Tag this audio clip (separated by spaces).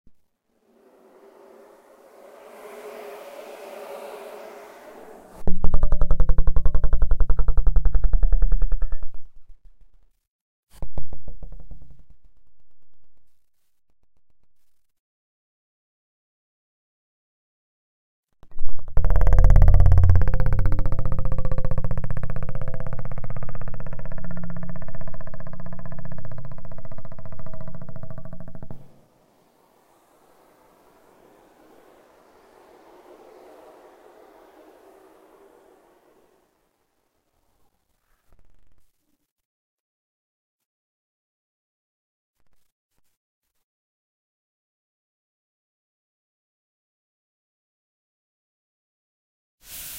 bass vocal csound